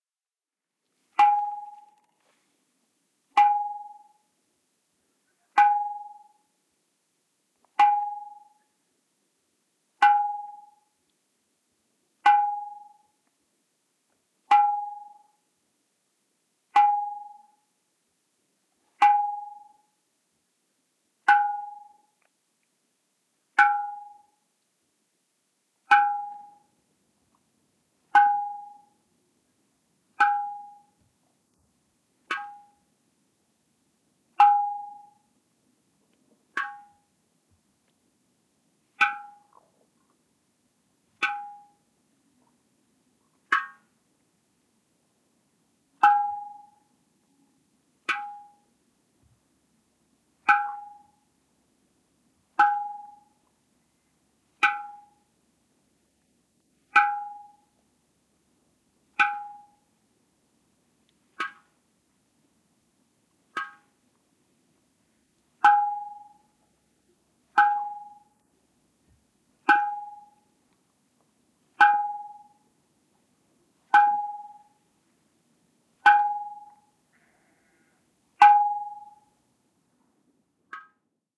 Drips on Bowl 2
A stereo field-recording of water dripping from a wet duvet hung on a washing line on to an upturned copper bowl.Less windy than previous one, there are some very distant voices and my stomach rumbling. Rode NT-4+Dead Kitten > FEL battery pre-amp > Zoom H2 line in.
xy, metallic, bowl, stereo, drips, metal, field-recording, copper, water, plop, drip, plops, dripping